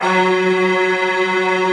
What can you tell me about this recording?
Synth Strings through home-made combfilter (32 Reason PEQ-2 two band parametric EQs in series). Samples originally made with Reason & Logic softsynths. 37 samples, in minor 3rds, looped in Redmatica Keymap's Penrose loop algorithm, and squeezed into 16 mb!